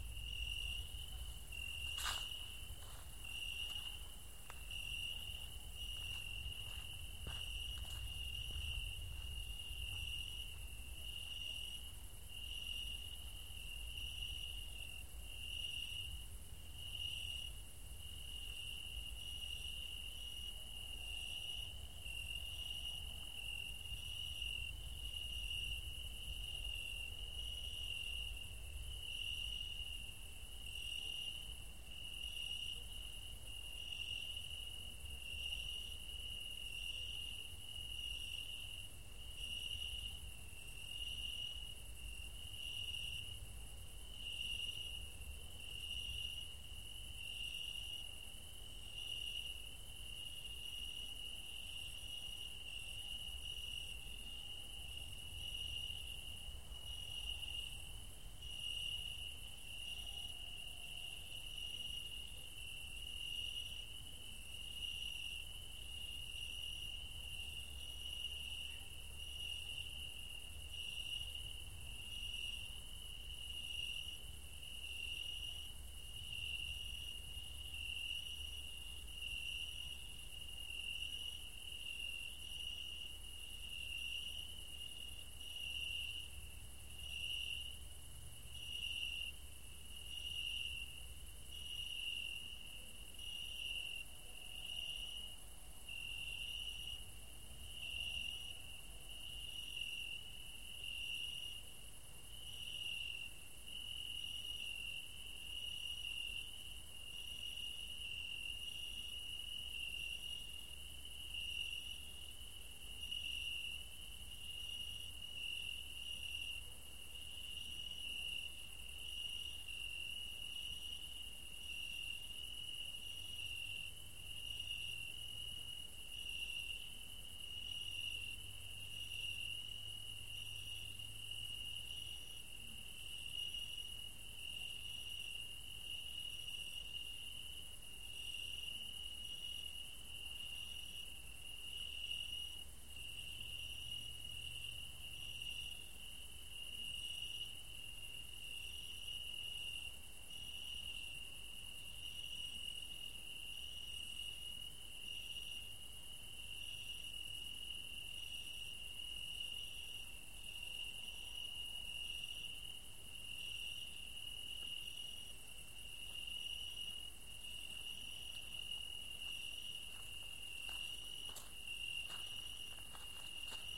After sunset I hanged on my MP3 player on the branch of a tree and recorded the ambiance. File recorded in Kulcs (village near Dunaújváros), Hungary.